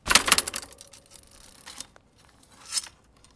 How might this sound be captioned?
bike thump
bicycle hitting ground after a jump
bicycle, bike